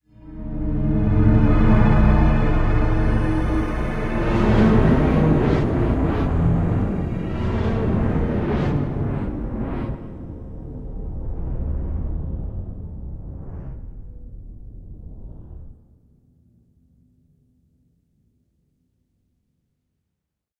A dystophic nightmare in slow motion during daytime... Created with SampleTank XL and the Cinematic Collection.